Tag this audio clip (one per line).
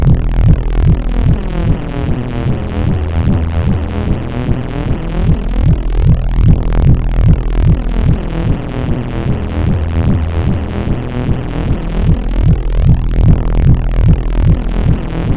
reese
detune